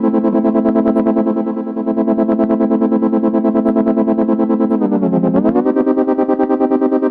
brooding melody/atmos